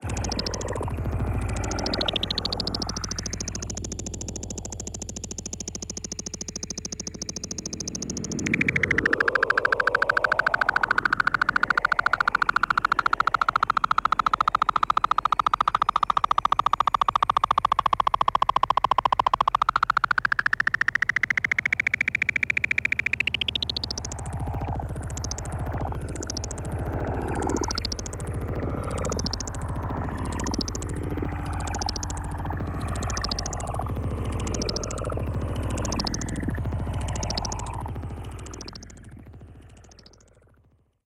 Synthesizer sequence 50
synthesizer processed samples